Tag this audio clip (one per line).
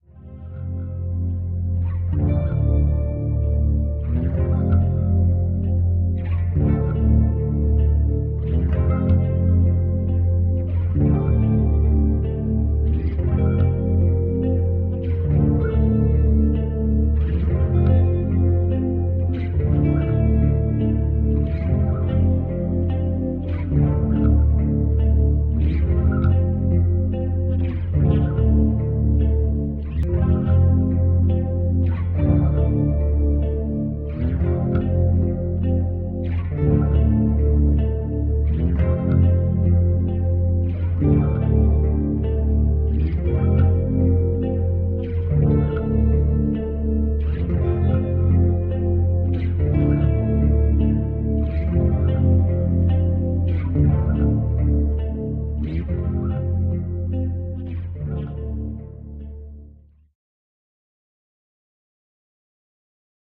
run
haunted